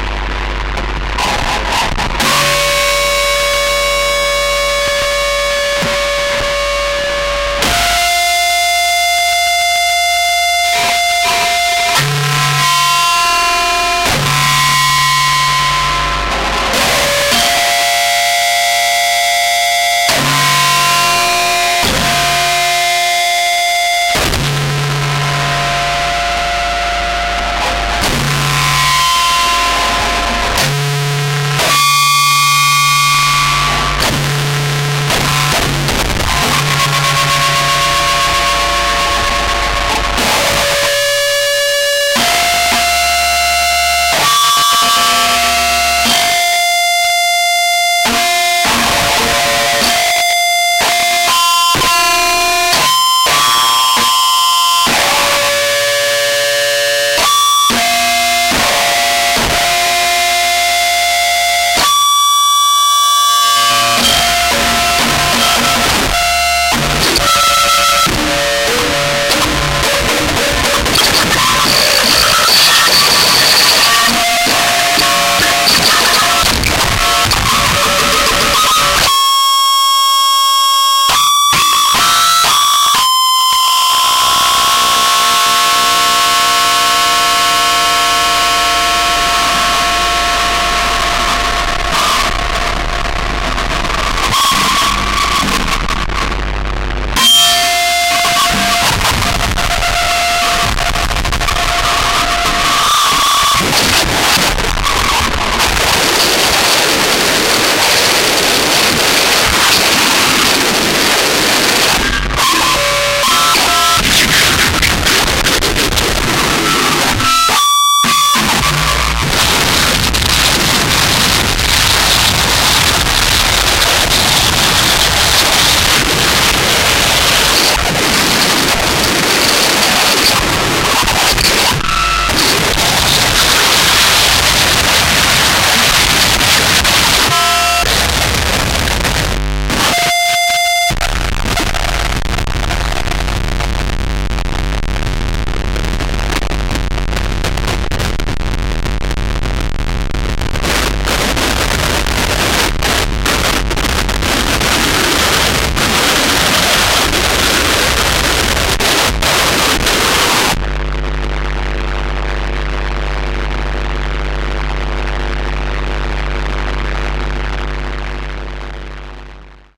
noisy feedbacks
feedbacks recorded with contact microphones on metal objects + plenty of distortion (BOSS Super OverDrive SD-1 Pedal)!